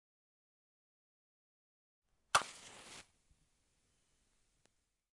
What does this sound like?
Lighting a fire with a safety match.